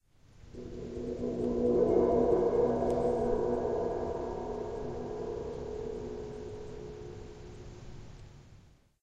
Suspended Cymbal Roll
cymbal, percussion, roll, suspended